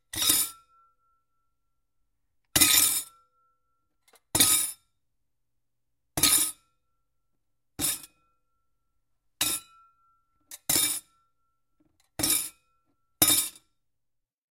FX DISHES DROP IN SINK
Dropping a few dishes into the sink, multiple times. Strong sound of the utensils hitting the plates and some resonance. Recorded with a Tascam Dr-40.